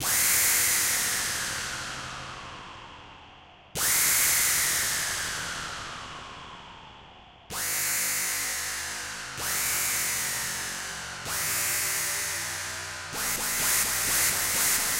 a horrible shrieking synth noise recorded in samplitude, i was just experimenting with noise from one speaker to the other. you could loop this, it's 8 bars long and recorded at 120bpm